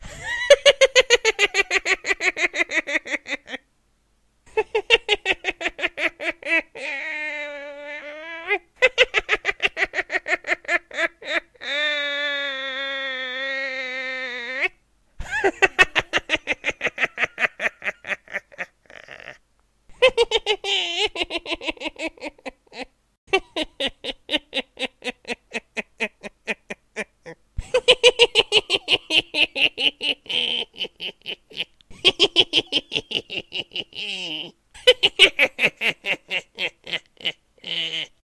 Evil Witch Laugh
Witch, Laugh, Evil